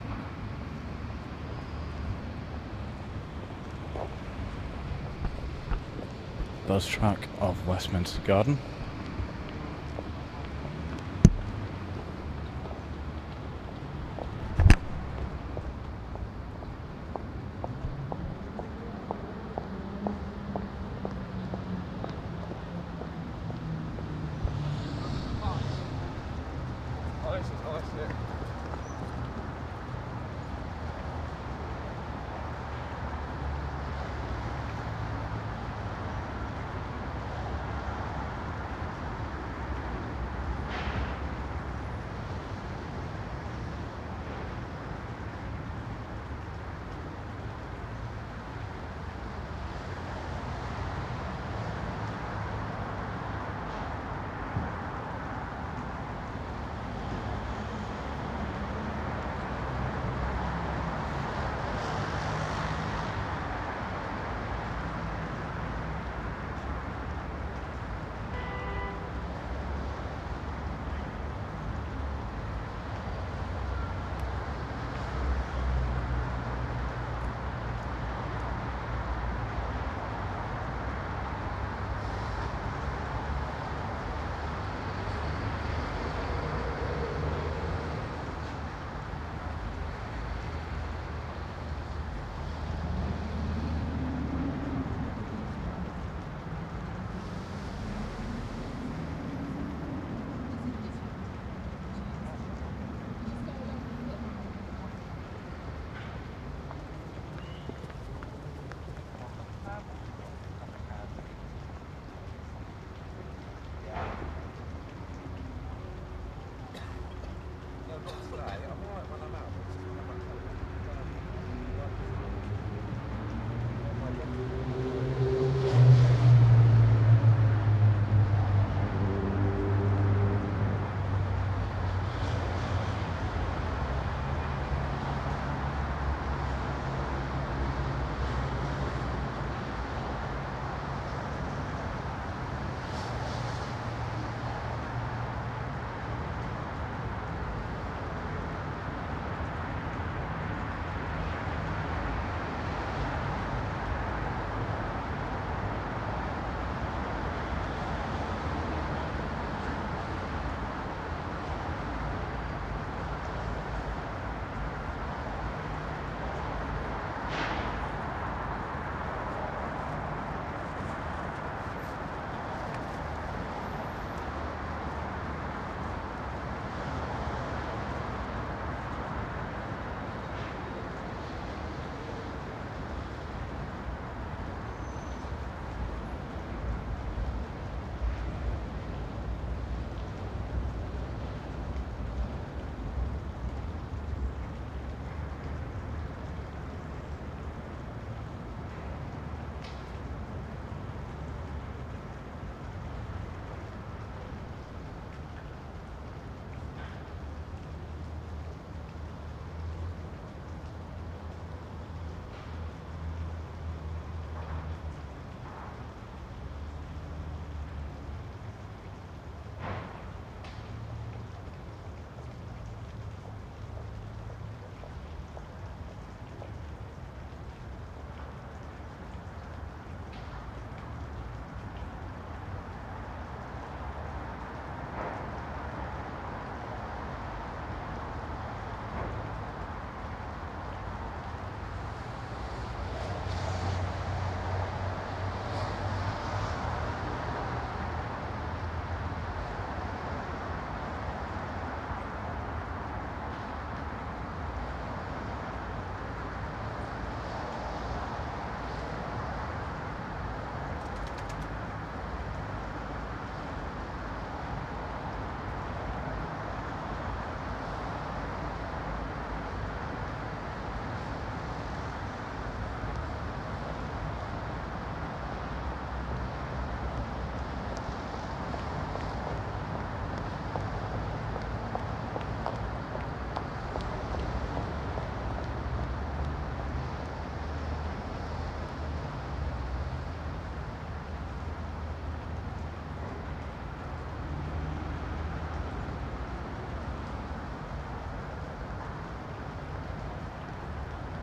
BUZZ TRACKTWESTMINSTER GARDENS 1
Ok so most of these tracks in this pack have either been recorded whilst I have been on set so the names are reflective of the time and character location of the film it was originally recorded for.
Recorded with a Sennheiser MKH 416T, SQN 4s Series IVe Mixer and Tascam DR-680 PCM Recorder.